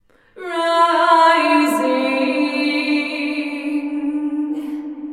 "rising" female vocal
A wet clip of me singing "rising". I used this as a backup vocal for a song of mine.
Recorded in Ardour with the UA4FX interface and the the t.bone sct 2000 mic.
Details (for those of you who want to use this in a mix):
The original song is in A minor, 6/4 time, 140 bpm and clip fits accordingly.
ambient, a-minor